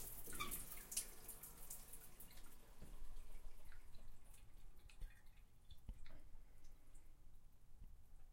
Shower turning off